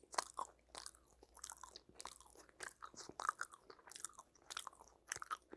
Ulsanbear eating Rice Gummy1
food, eating, yum, gummy, chewing, eat, chewy, gum